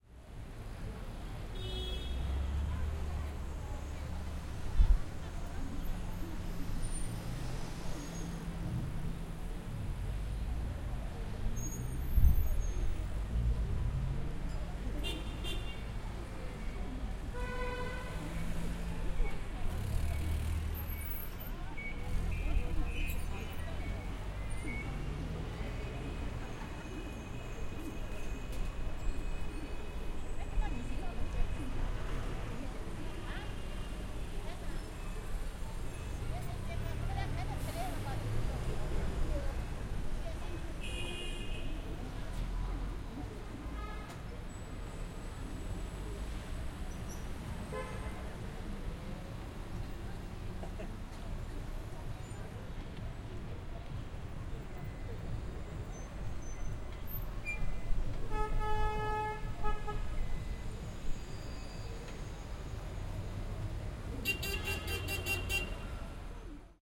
This is a field recording of a traffic intersection in suburban Shanghai. Traffic sounds and the voices and footsteps of passerby can be heard as well as the electronic announcements of a nearby store.